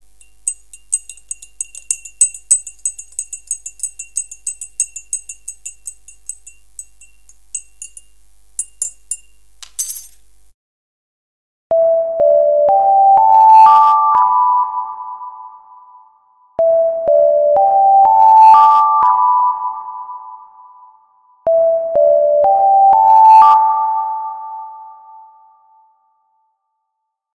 TAI cep melodi 000 HQ
This is a melody for my mobile. I want my cellphone rings but not disturbs anyone. If I don't hear the tea spoon noise, the break time bell of the facility rings.
alarm, mobile, non-disturbing, spoon, phone, reverb, melody, tea, bell